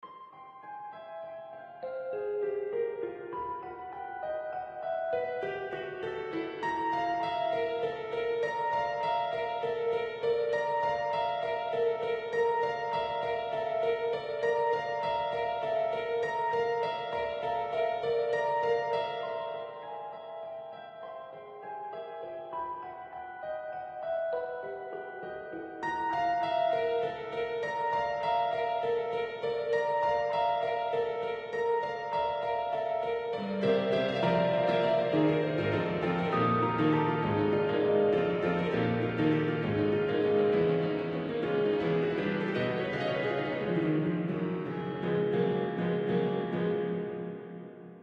Weird Piano/Synth Snippet
synth, weird, piano, piece